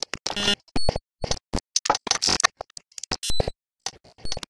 clicks and pops 6
A strange glitch "beat" with lots of clicks and pops and buzzes and bleeps. Created by taking some clicks and pops from the recording of the baby sample pack I posted, sequencing them in Reason, exporting the loop into Argeïphontes Lyre and recording the output of that live using Wire Tap. I then cut out the unusable parts with Spark XL and this is part of the remainder.